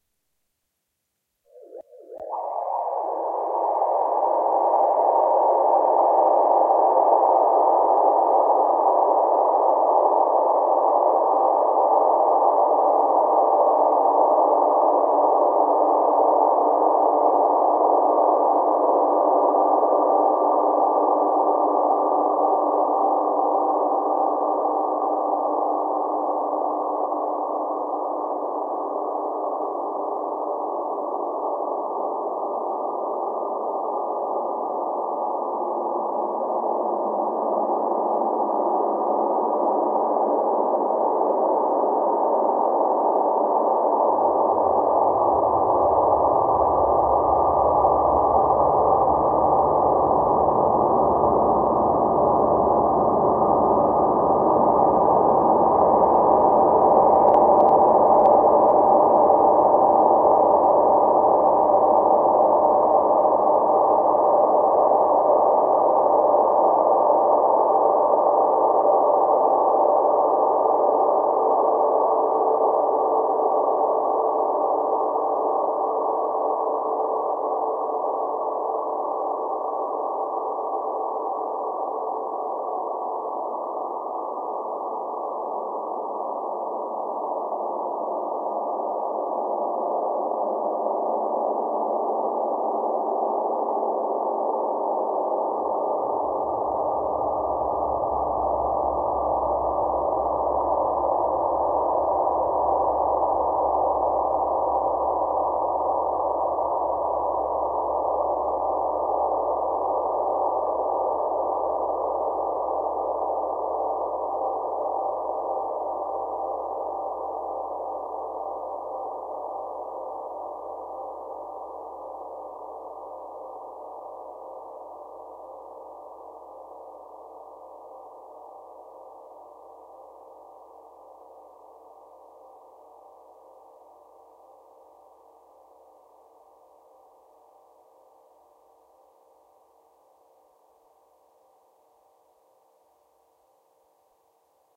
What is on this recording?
Sound made with the AlienSpaceWeaver vst
effects: reverb, delay, echo